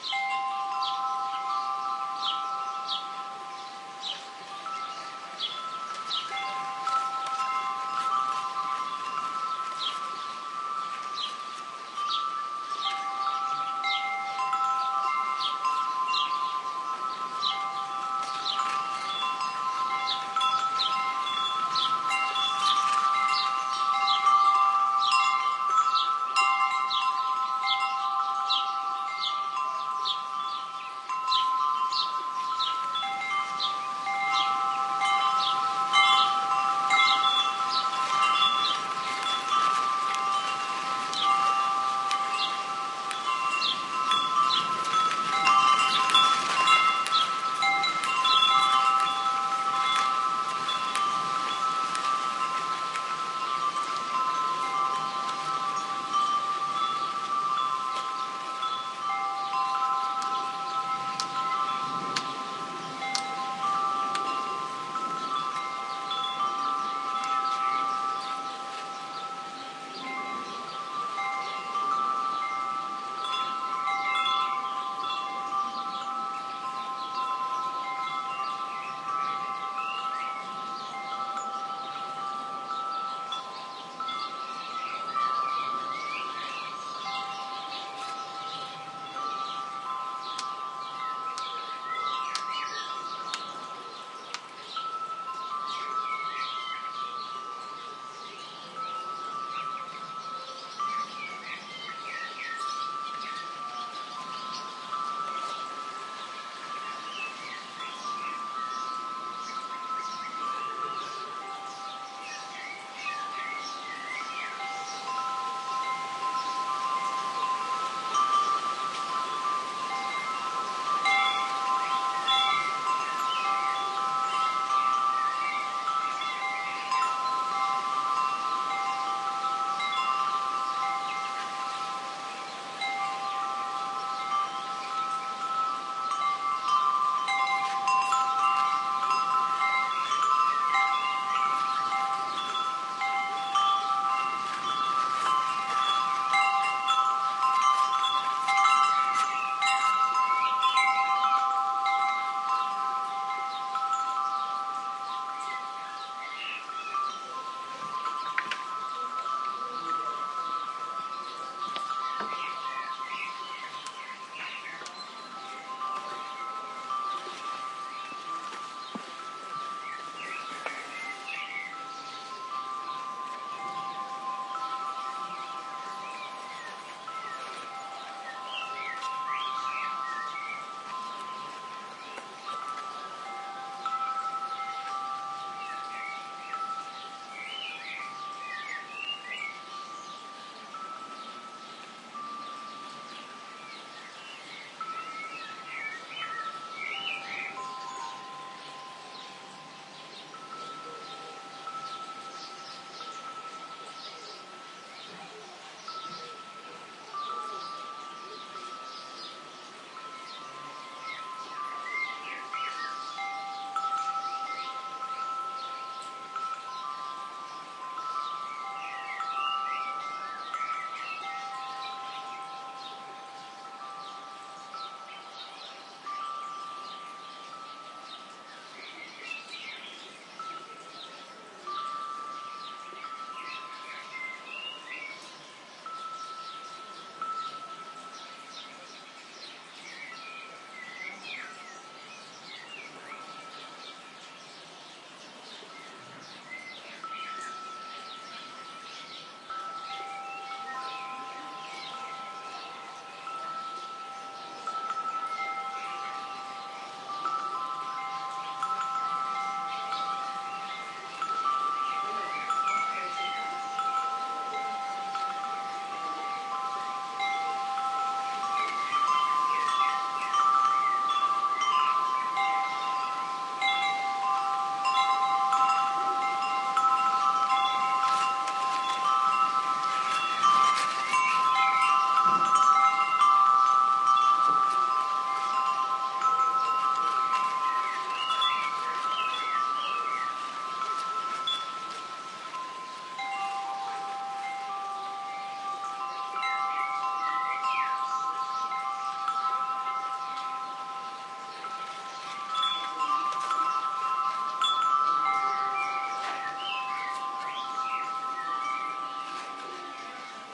20090501.wind.chimes.afternoon
Wind on chimes and tree, birds singing in background. Strongest gusts caused some noise on the mics. Recorded at Villa Maria , Carcabuey (S Spain). Sennheiser MH60 + MKH30 into Shure FP24 preamp, Edirol r09 recorder. Decoded to mid-side stereo with free Voxengo VST plugin